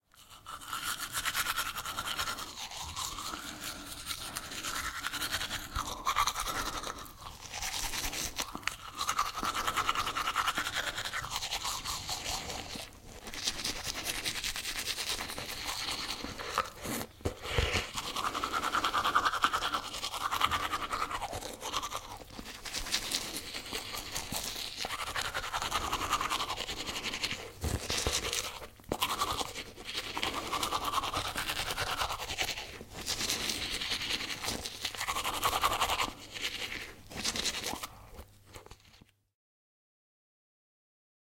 Cleaning teeth with toothbrush.
cz, cleaning, tooth, hygiene, panska, toothbrush, teeth, bathroom, brushing